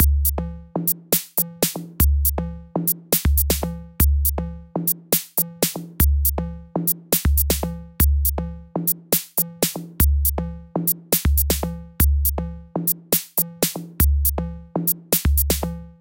weird electronic sounding Drum loop created by me, Number at end indicates tempo
beat, drum, drumloop, electronic, idm, loop, percussion